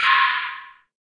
Metallic Backbeat no 2 FRUITY TWEAKED
Exotic Electronic Percussion37
electronic, exotic, percussion